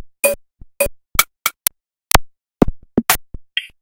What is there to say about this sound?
jigsaw beats
mish mash mix of hard percussive sounds generated with NI software
beat, bump, drum, static